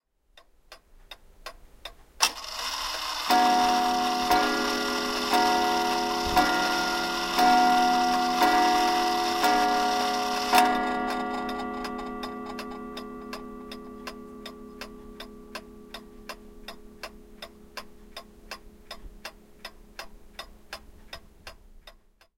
Clock Chime, Antique, A
Raw audio of an antique, clockwork clock chiming on the 8th hour with some of the ticking left in. The recorder was about 5cm away from the clock. The clock's mechanisms were left in their wooden casing, unexposed.
An example of how you might credit is by putting this in the description/credits:
The sound was recorded using a "H1 Zoom recorder" on 19th August 2017.